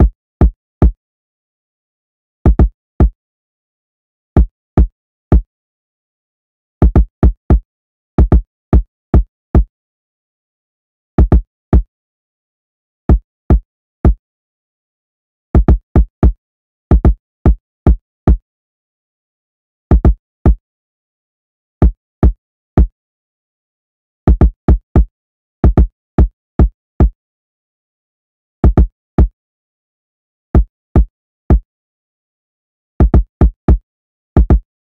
Trap Kick, 110 BPM
Kick, Trap, Hard